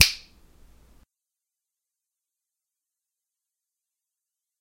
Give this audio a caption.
finger snap 02
snap, finger, fingersnap, fingers